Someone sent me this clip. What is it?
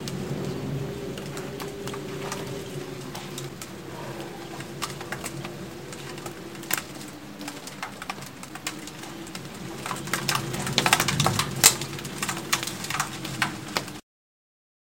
rain home coffee
coffee, home, rain